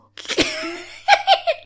A witchy laugh.